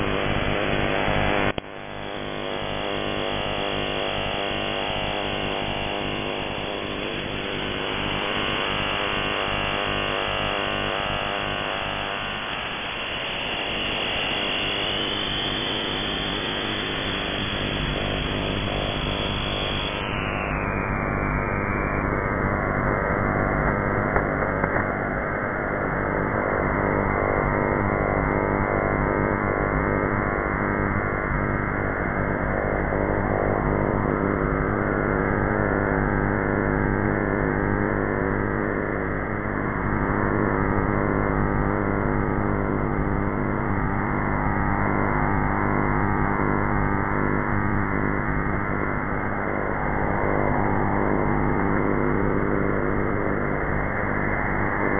Random captures from the Wide-band WebSDR project.
radio, static, shortwave, noise, shortwave-radio